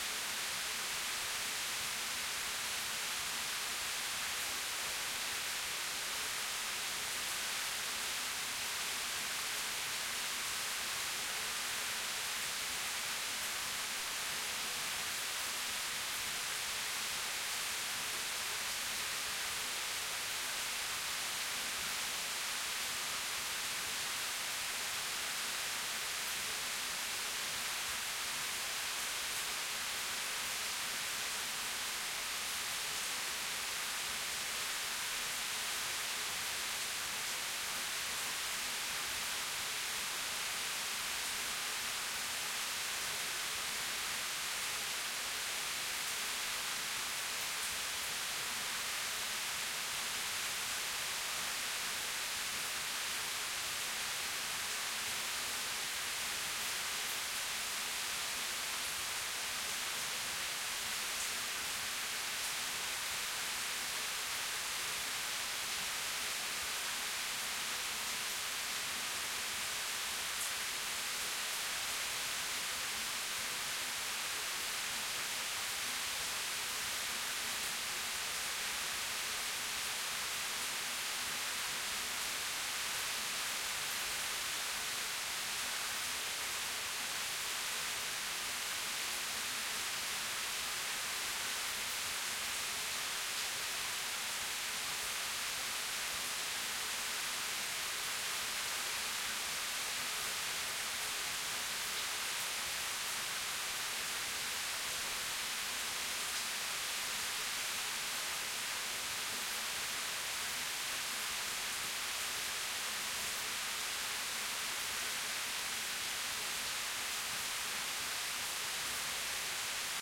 Sound of heavy rain taken from a 1st Floor balcony.
Balcony surrounded by trees.
Apologies in advance for the low level.
Signal Flow: Zoom H6, Omni-Mic attachment
heavy-rain, nature, outside, rain, weather